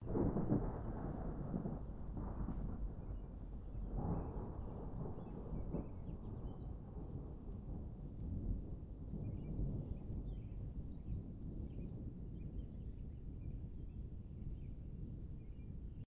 Thunder Long 2
Nice long rolling set of small bits of thunder in the distance on a rainy night.
Two Rode NT-1A's pointed out a large window on the second story of a building.